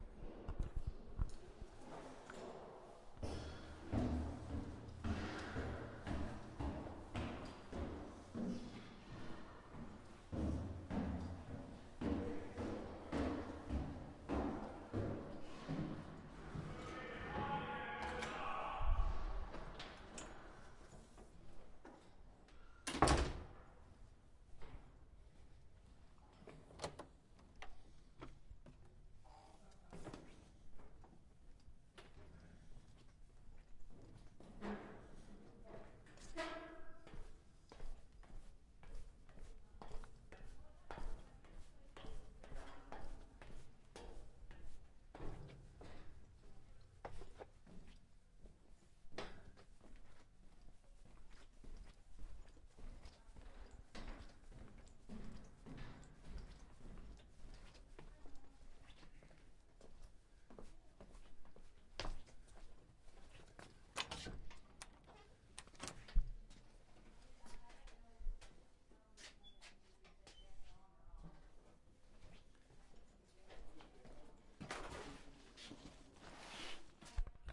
Took my Zoom H4 with me on the last flight of a 5 story metal stairwell then on the backstage catwalk - first back stage, then over the audience, then to the sound booth of a theatre I was working at. Note: I did not realize my pants made so much noise as I was walking.